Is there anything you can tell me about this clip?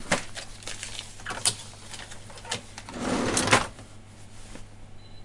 cashbox 04 small market
Atmo in small market
Recorded on ZOOM H4N
market, asian, small, sound